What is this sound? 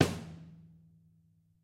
My own drum recording samples. Recorded in a professional studio environment
Crash, Drum-kit